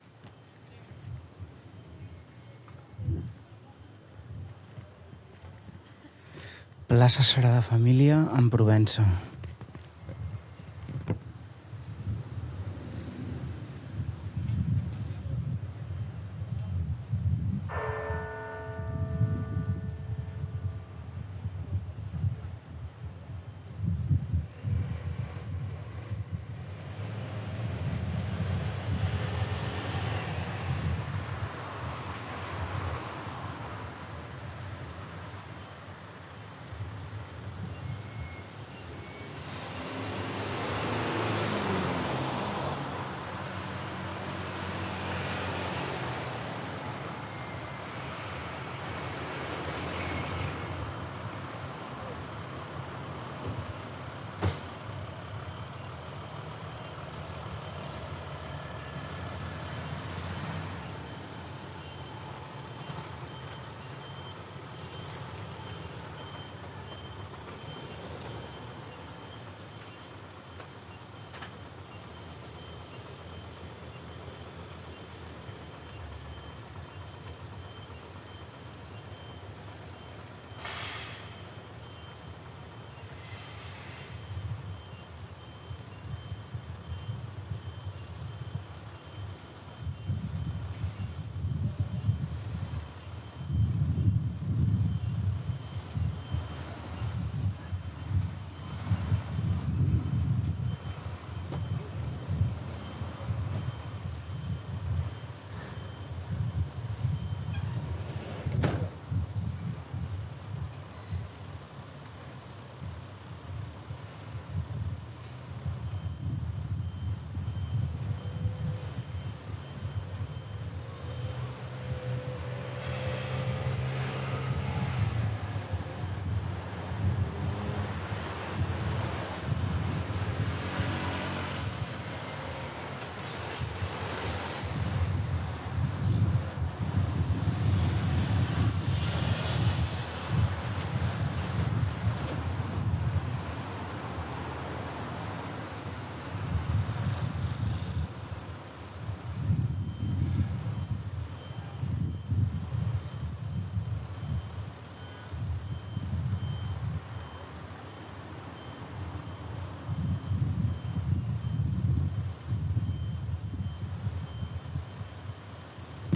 Ambience Urban Outdoor at Plaça Sagrada Familia Sardenya
Urban Ambience Recording at Plaça Sagrada Familia, September 2020 during Covid Post-Lockdown. Using a Zoom H-1 Recorder.
Ambience, Birds, ConfinedSagradaFamilia, Humans, Outdoor, Traffic, Urban, Wind